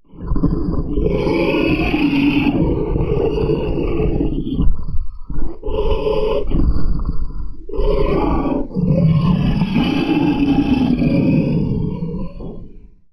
Growling Monster 003
A growling monster sound effect created using my voice and extensive pitch shifting in Audacity. Can be used for monsters, dragons and demons.
Animal, Creature, Demon, Dragon, Growl, Growling, Monster